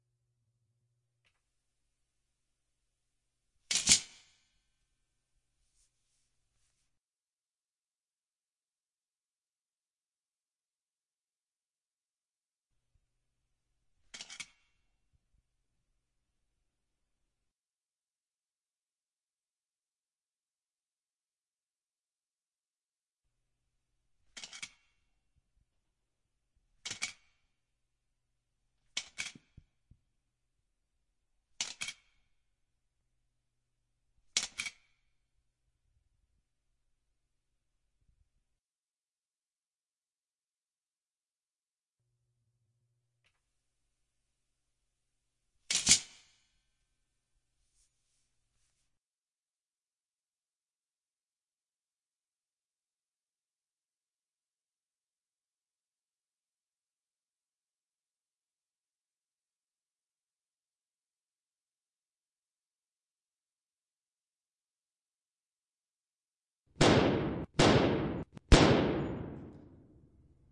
Load gun and shoot
Origionally a recording of a trashcans lid but works nice as a bullet reloader.
Recorded with Korg88 and a TK-600 microfone. Simple and nice..
Gun, Shoot, Load